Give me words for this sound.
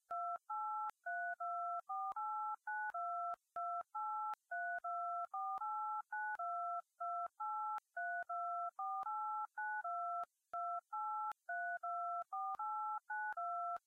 DMF Tones
Audacity-made, Creative, DMF-Tones, Edited, Free, short